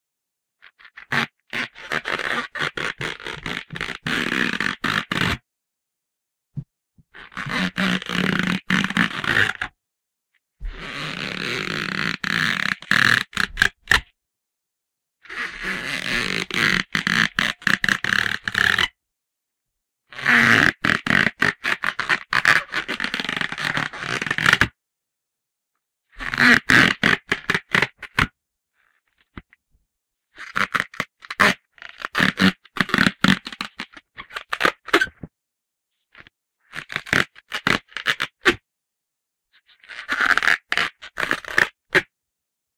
Stretching effect
A cartoon stretching sound I made with a balloon.
cartoon, stretch